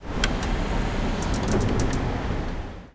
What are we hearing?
Park barrier
Sound of the exit barrier lifting up to let a car getting out.
barrier, campus-upf, centre, comercial, glories, mall, park, parking, payment, shopping, UPF-CS13